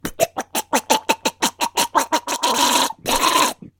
A guy getting choked.
male, person, choke